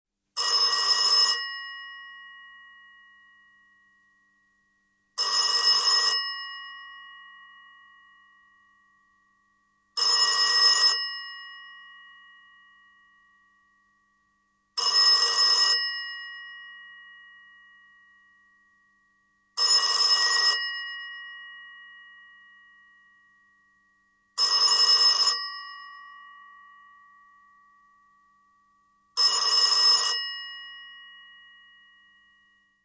An old bakelite phone ringing. It's an Ericsson RIJEN made in 1965.
environmental-sounds-research, old, phone, ring